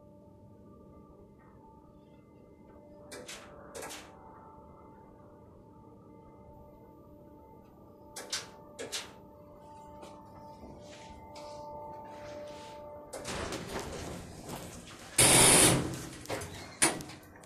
Otis elevator and malfunction door.